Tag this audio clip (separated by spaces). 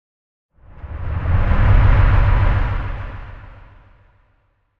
car passing traffic